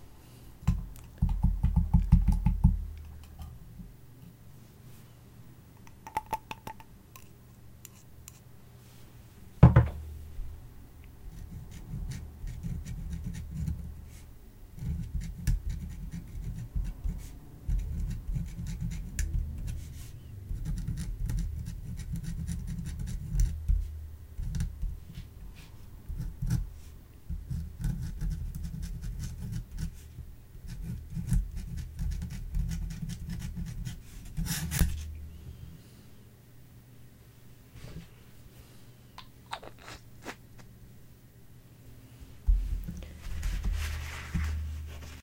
ink writing
Writing on paper with a dip pen. Misc ink bottle sounds and paper shuffling.